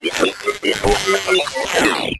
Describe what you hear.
A synthetic voice sound effect useful for a somewhat confused robot to give your game extra depth and awesomeness - perfect for futuristic and sci-fi games.
AI, arcade, artificial, computer, electronic, futuristic, game, gamedev, gamedeveloping, games, gaming, indiedev, indiegamedev, machine, robot, sci-fi, sfx, Speak, Talk, videogame, videogames, Vocal, Voice, Voices